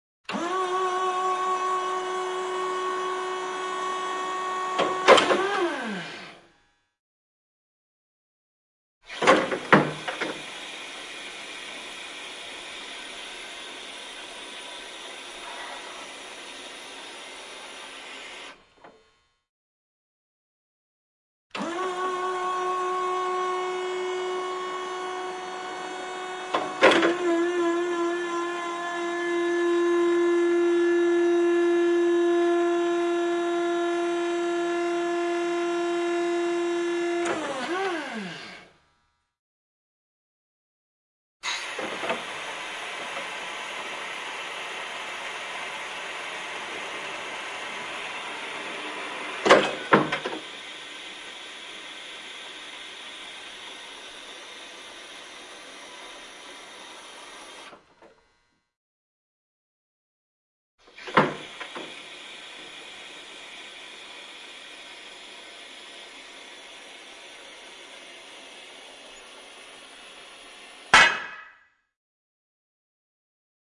scissor lifts machines up down hydraulic movements2
recorded with Sony PCM-D50, Tascam DAP1 DAT with AT835 stereo mic, or Zoom H2
lifts, movements, hydraulic, down, scissor, machines, up